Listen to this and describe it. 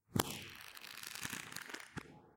GLISIC Marijana 2020 2021 book
I recorded the sound of a book being closed, reversed the direction and added reverb and phase effects.
book, echo, pages, paper, reverb, reverberation